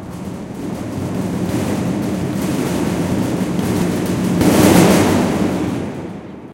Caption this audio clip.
Sounds recorded at Colégio João Paulo II school, Braga, Portugal.